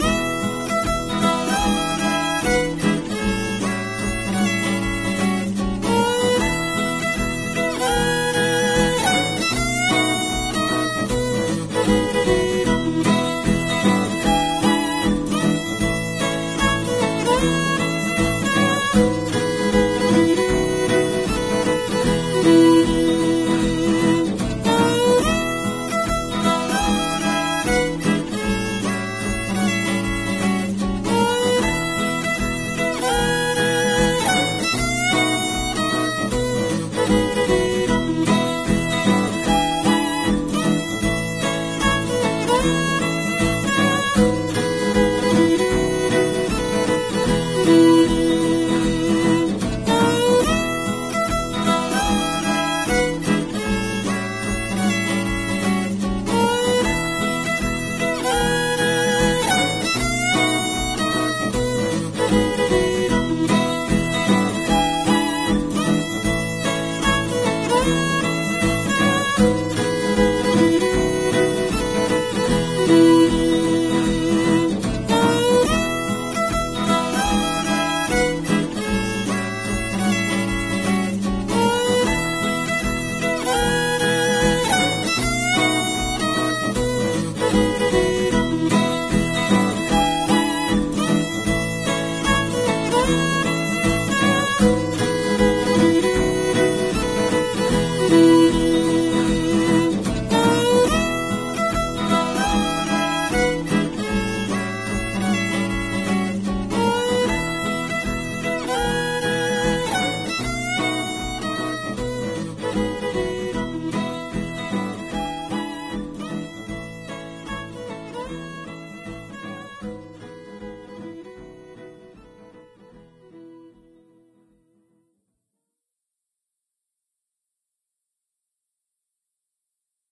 Log Cabin
Music, Audio